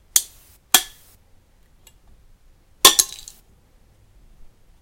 Snapping a metal can's...whatever it's called off. Lol.